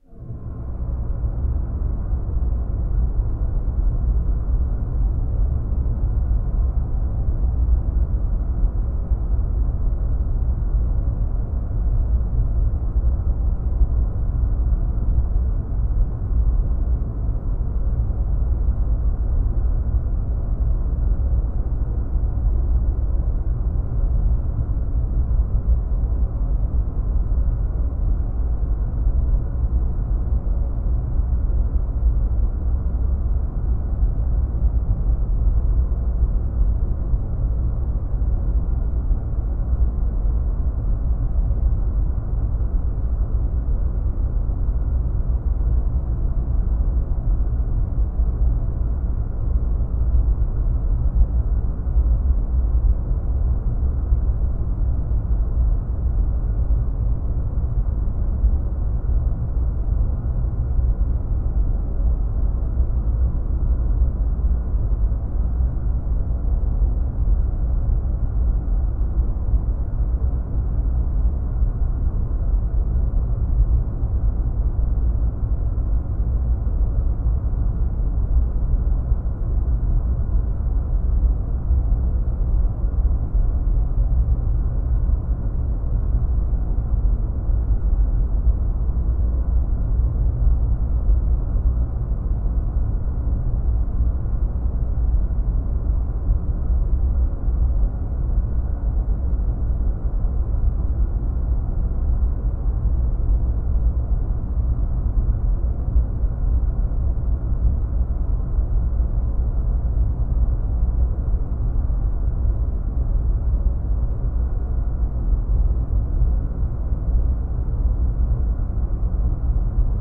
Two minutes of dark, low frequency drone.